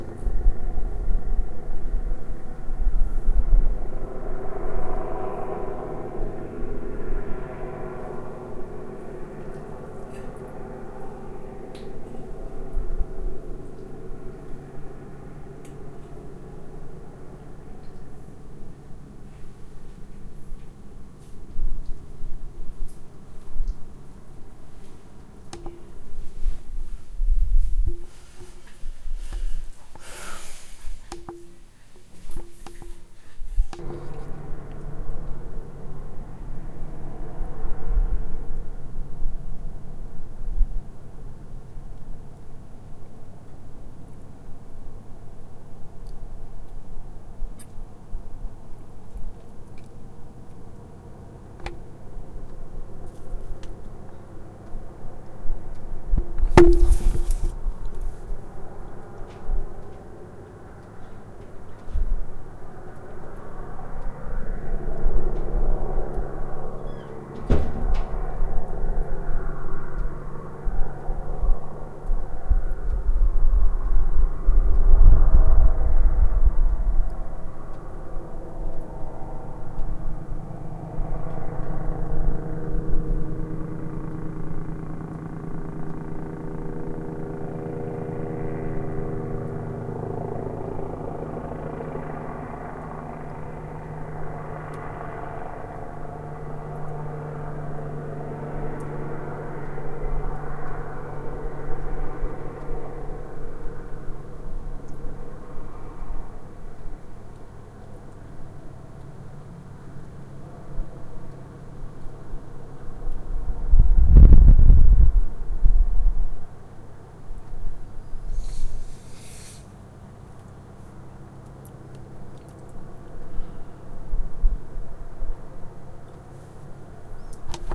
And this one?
Air Tone London With Helicopter
Recording of city scape late at night with helicopter scouting around close by.